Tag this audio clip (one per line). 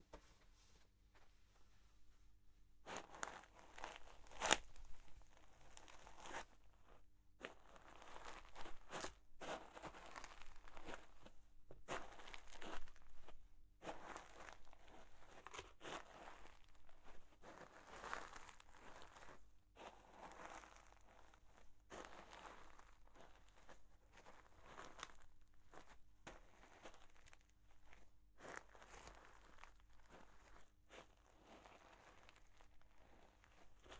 Footsteps
Walk
Sand